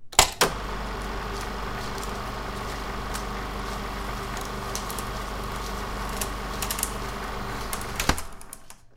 Proyector16mm-1
Uzi's 16mm film projector playing , turn in , turn off
16, environmental-sounds-research, movie